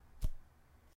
17 -Sonido agarrar
sonido de agarrar algo